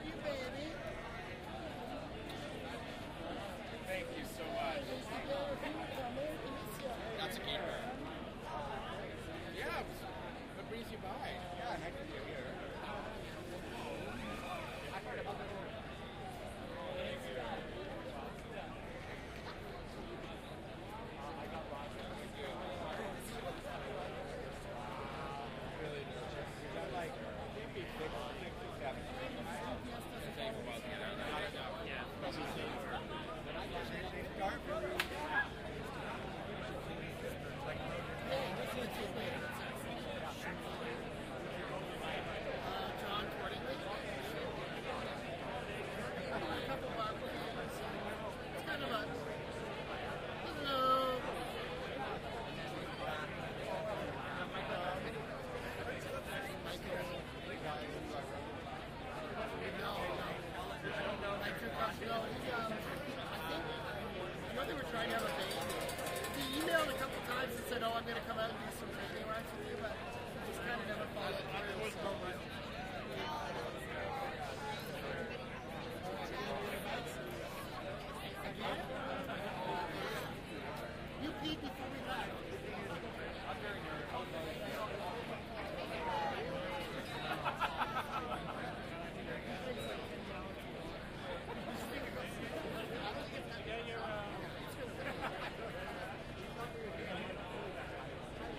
Stereo binaural field recording of a large crowd talking amongst themselves.
binaural, crowd, field, group, recording, stereo, talking, walla